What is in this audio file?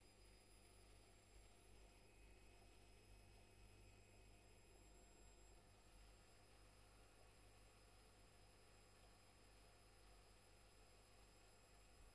Washing machine 1
Recording of my washing machine.